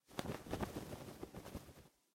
bird flapping 3
Various bird flapping
bird, flapping, wings